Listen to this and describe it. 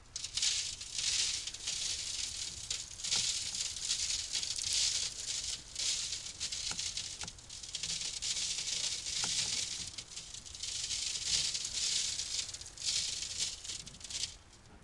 sand pour on metal screen FF680
sand, sand pour on metal screen, metal, screen, metal screen
metal, pour, sand, screen